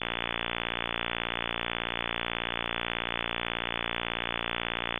Buzz Tone Midi# 34
A buzz tone I generated in Audacity. It sounds a little like an AM radio sound.
34, audacity, buzz, generated, midi, note, tone